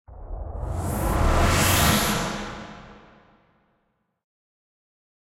FX Admiral Warp01
A synthesized warp sound, used when a character was sucked through a time portal.
sci-fi, synth, warp, portal, sci, space